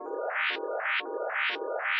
Even more melodic patterns loops and elements.
image, sound, space, synth